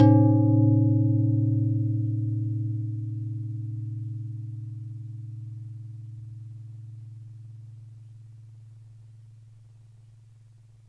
Soft strike on a bog wok. Sounds very warm with slight modulation